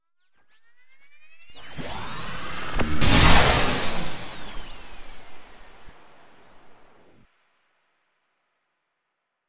Energy Weapon Laser
Synthesized and layered sound of an energy weapon shooting.
Charge, Energy